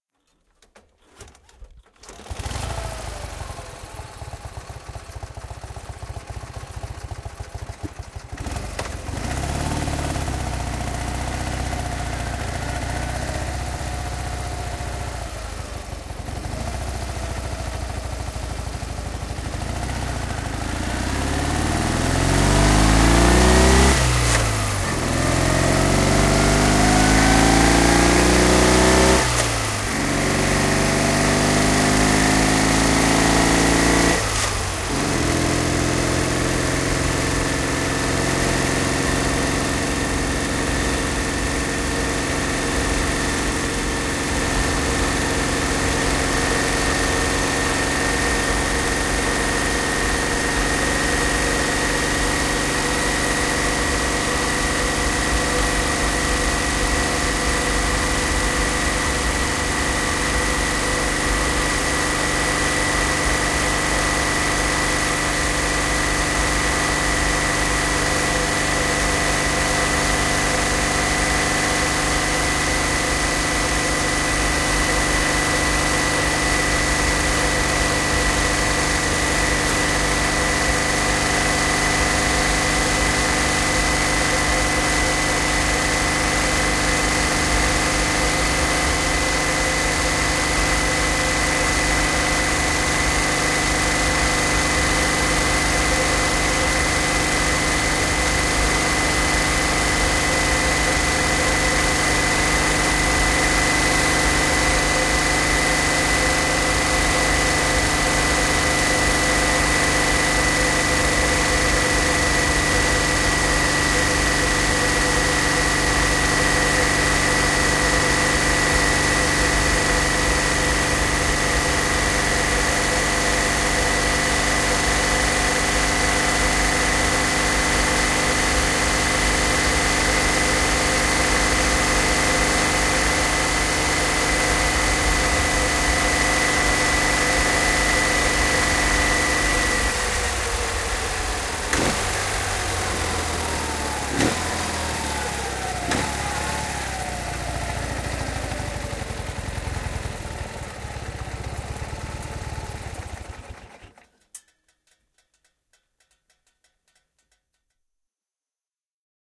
Moottoripyörä, vanha, ajoa asfaltilla / An old motorbike, start, riding on asphalt about 80 km/h, stopping, switch off, Ural 650 cm3, a 1961-1990 model
Ural 650 cm3, vm 1961-1990. Käynnistys, ajoa mukana asfaltilla n. 80 km/h, pysähdys, moottori sammuu. (Ural, sivuvaunullinen, venäläinen IMZ, 4-taht.).
Paikka/Place: Suomi / Finland / Kitee / Kesälahti
Aika/Date: 08.07.1994
Finnish-Broadcasting-Company, Motorcycling